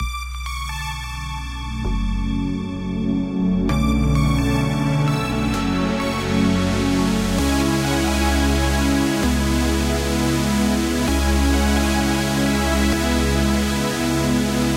Dance Electro INTRO 04
Made with FL Studio Sytrus VST and more
club, house, rave, trance